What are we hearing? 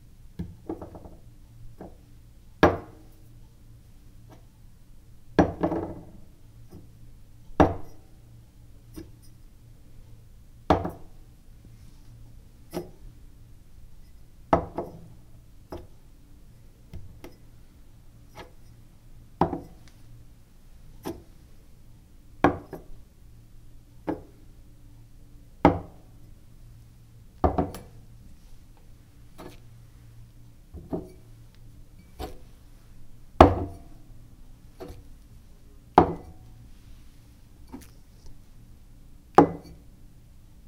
Picking up and setting down two different glasses. Linoleum countertop. A bit of water in each glass. The second one is more like a jar.